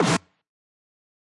snare dnb 2.0
made by mixing synthesized sounds and self-recorded samples, compressed and EQ'd. used 2 different real snare drums for this, and a synthesized one
dnb, drum-n-bass, fat, snare